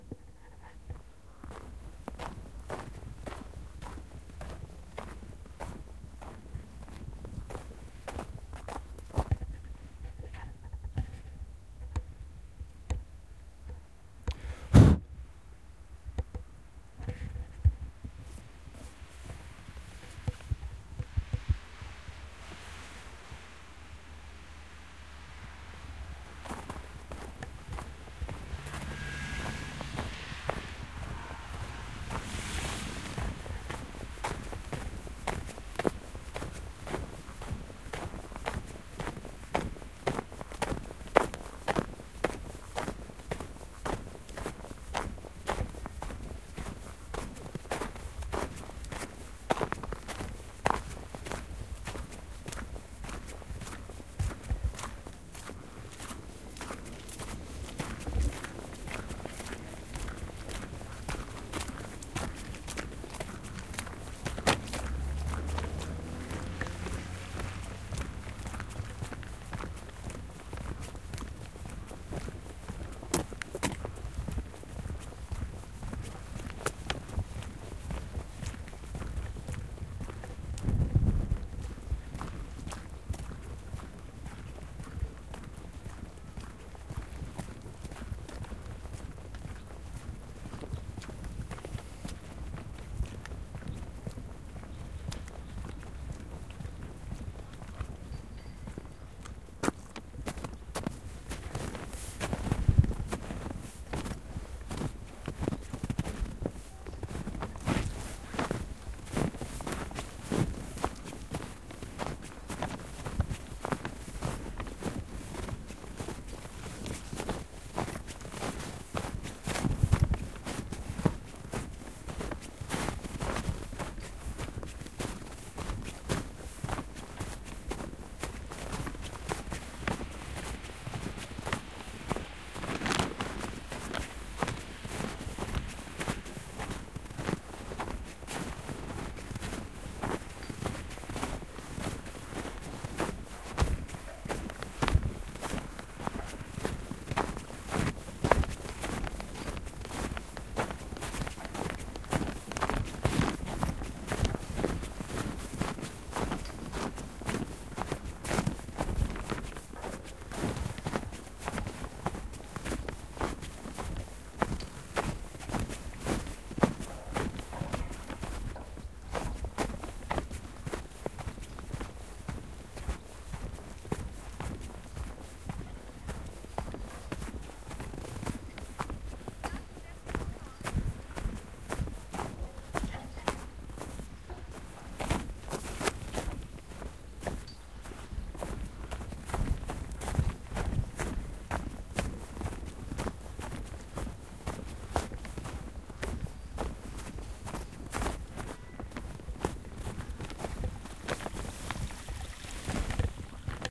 walking in the snow
I was walking on different thickness of snow, recorded with Zoom H4n
foot, footsteps, snow, step, steps, walk, walking, winter